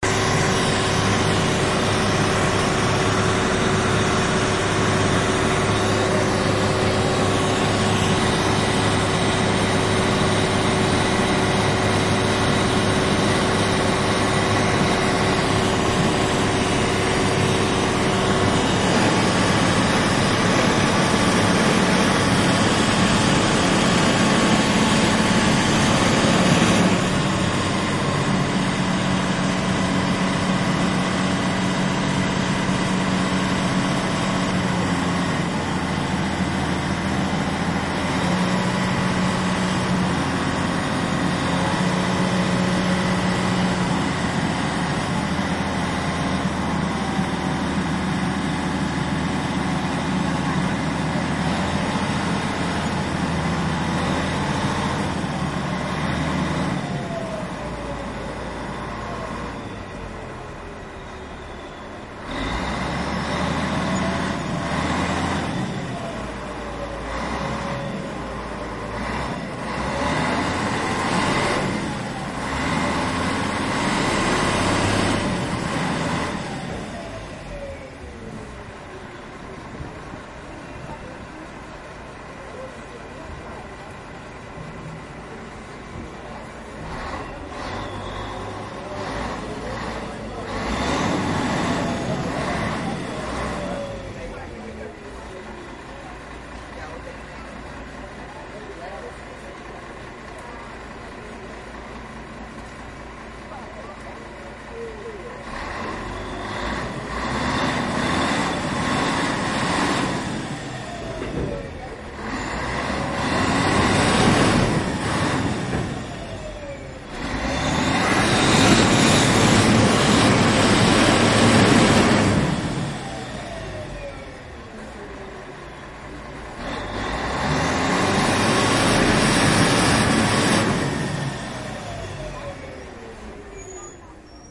Motor of a Ship
Many noises of a ship motor